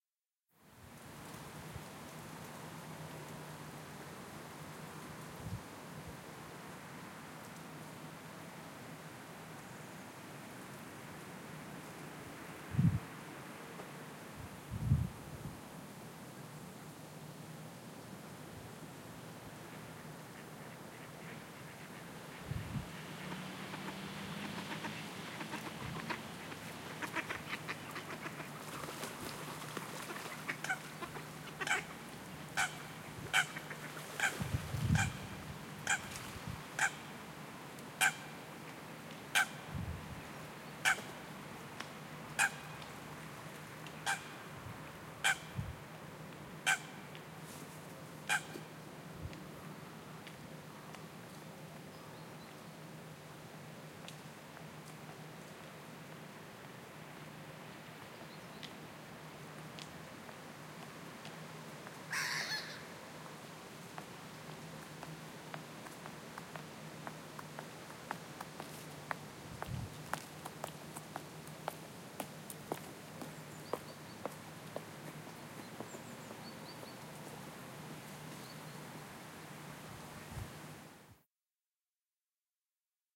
Park Zeist augustus 2010 wind light rain footsteps waterbirds
Zoom H4n X/Y stereo field-recording in Zeist, the Netherlands. General ambiance of park, residential.
background, atmo, netherlands, atmosphere, rain, park, dutch, atmos, soundscape, birds, background-sound, ambience, field-recording, ambiance, zeist, holland, general-noise, ambient, footsteps